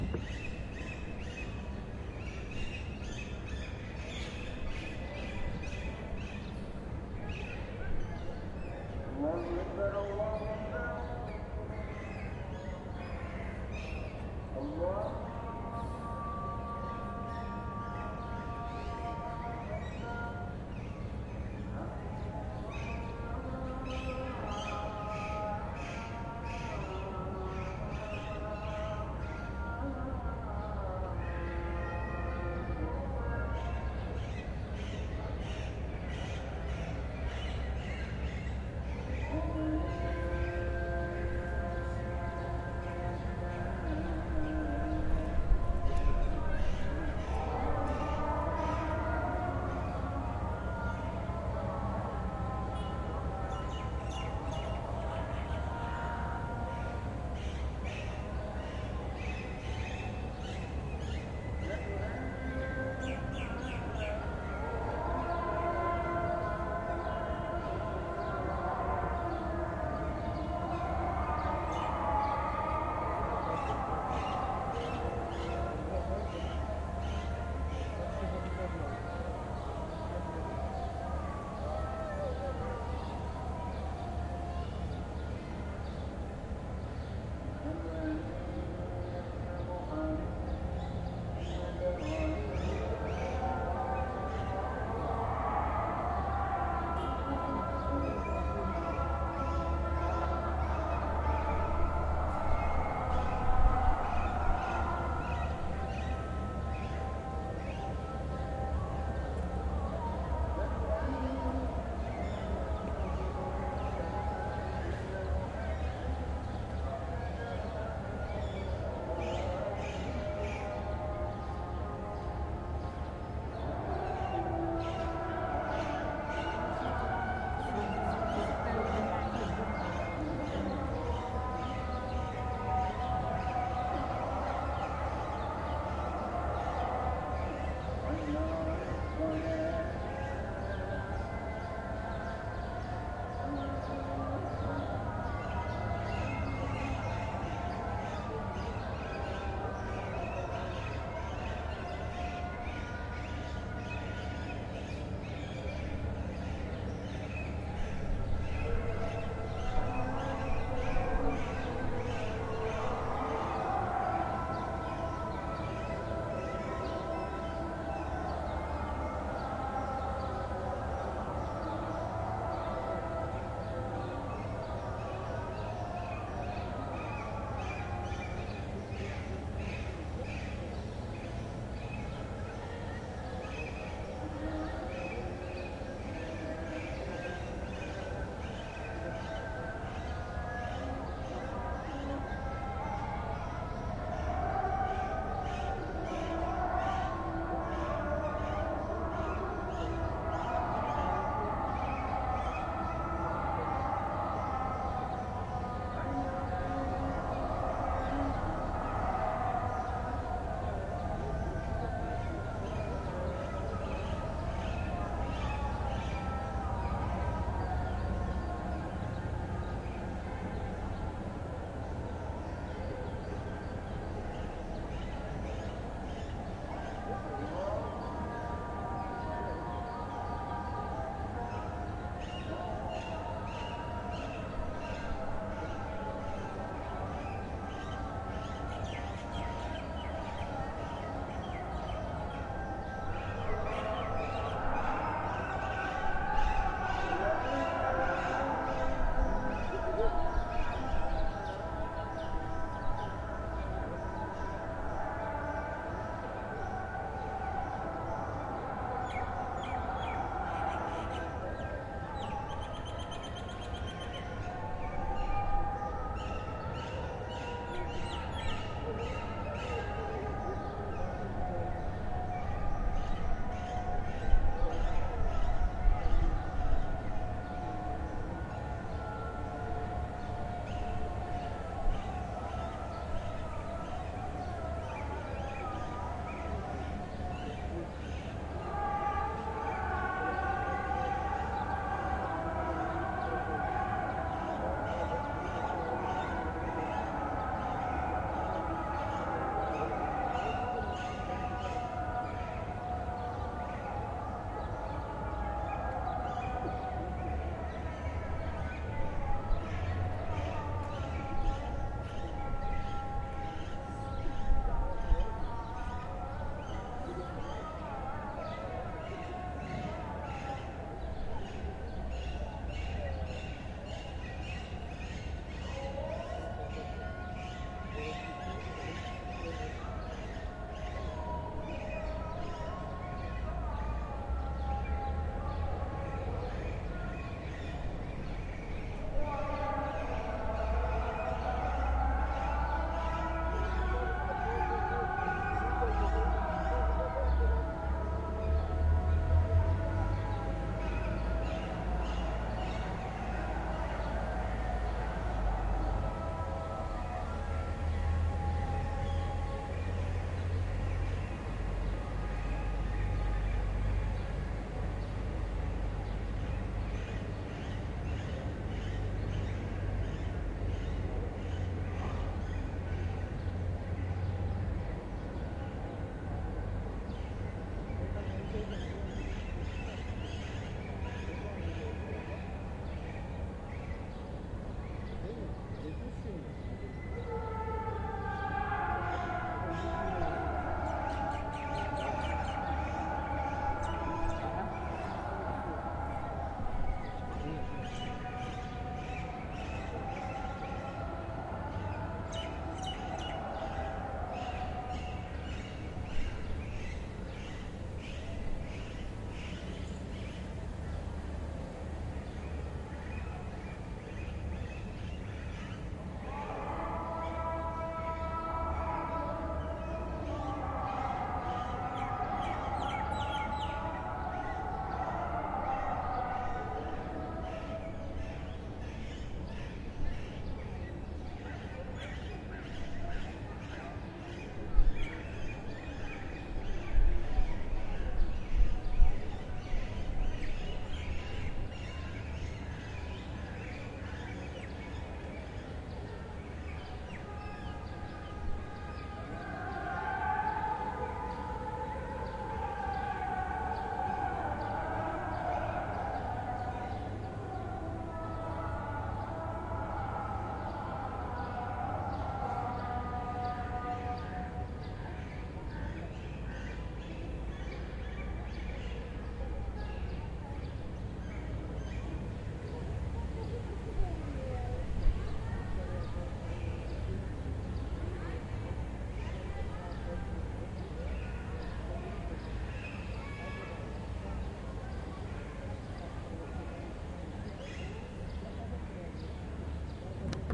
Filmed in Gülhane Park Istanbul during 1pm call to prayer